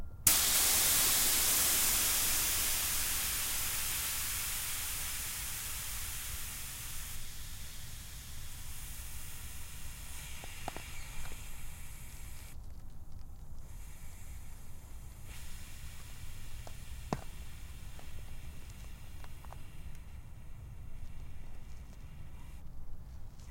Valve 1(air, release)
Releasing an air valve on a bycicle.
air, bicycle, release, valve